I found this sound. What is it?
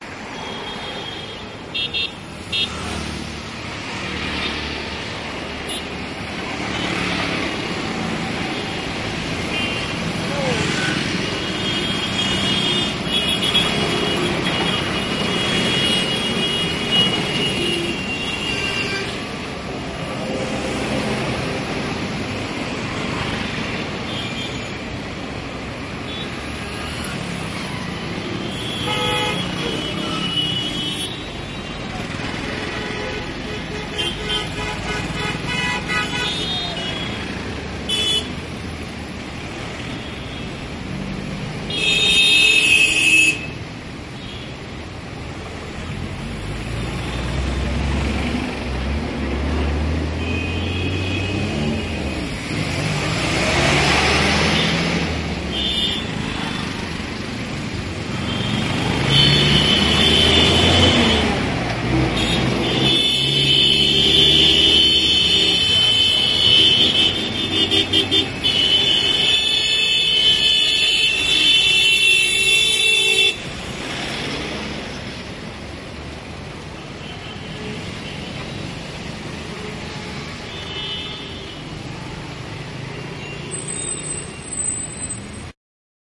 SE 4CH DELHI ATMO cars horns traffic jam busy street (Binaural conversion & shorter version)
Classic horning on the streets of New Delhi.
Full 4 channel version will be available as separate file
jam, cars, horns, trucks, traffic, Delhi, street